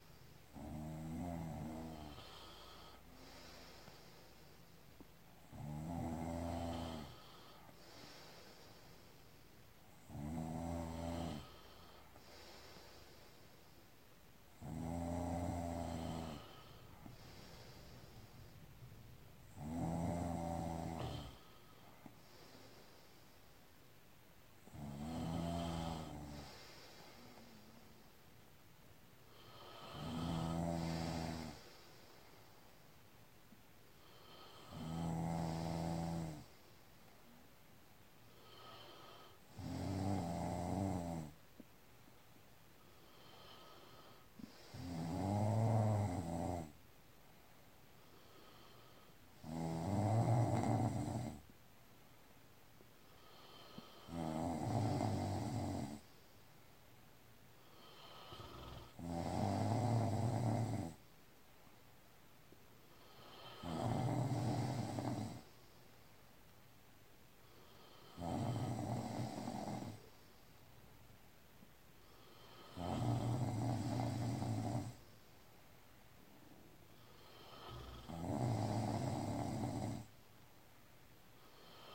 snoring clip
This was recorded on a phone and has one person breathing whilst another snores. It is quite rhythmic and gentle.
bed, bedroom, couple, female-snoring, middle-aged, sleep, sleeping, snore, snoring